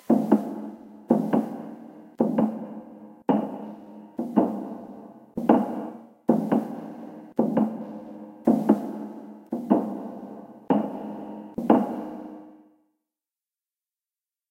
0129 Walking on Metal 1
By request of M-RED, a Foley recording of someone walking on metal stairs or just any metal surface. It's really a metal meshed crate. It provided some good reverb, but I added just a TINY amount after recording it. Instead of just hitting it, I took a shoe and dropped it lightly on the crate. The samples turned out pretty good. I'm happy with them. It makes me want to do more Foley recordings! They're fun. Oops, I didn't notice the noise in there, well, put it through a low-pass filter or some eq-ing and it should be fine.
lofi, knocking, mesh-crate, thunk, city, request, steps, walking, footsteps, foley, shoe, metal, step, footstep, concrete, pounding, foot, thump, boom